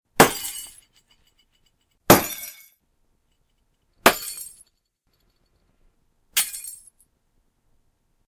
Several different size light bulb pops.
crash, light, pop, smash, bulb, glass